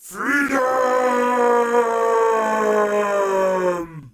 Freedom Dry
call, freedom, gibson, masterclass, vocal